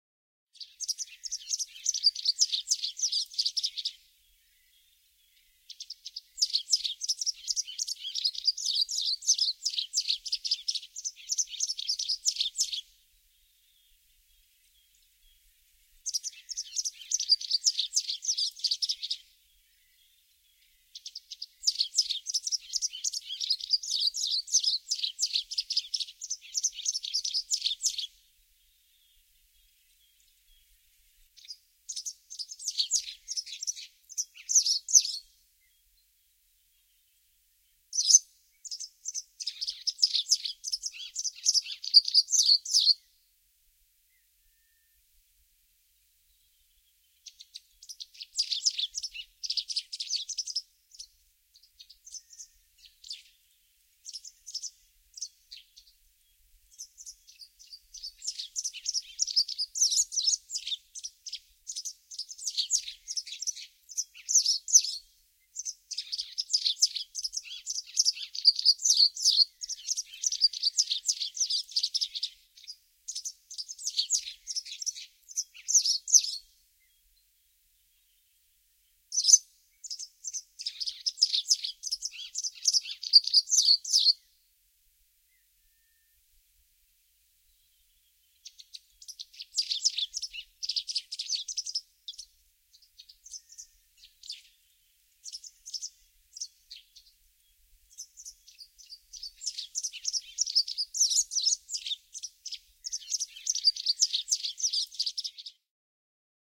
Västäräkki, laulu, kevät / A white wagtail singing, twittering in the spring on a rock
Västäräkki laulaa, visertää kivellä.
Paikka/Place: Suomi / Finland / Lohja, Retlahti
Aika/Date: 26.04.2000
Birdsong, Birds, Twitter, Bird, Yleisradio, Nature, Linnunlaulu, Soundfx, Lintu, Field-Recording, Finnish-Broadcasting-Company, Yle, Viserrys, Suomi, Spring, Wagtail, Linnut, Tehosteet, Luonto, Finland